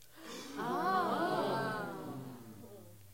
Gasp 2 with wonder
Small crowd gasping with wonder / awe
theatre, group, gasp, amazement, crowd